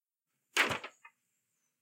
Paper Hitting Ground5
Paper falling and hitting the ground free.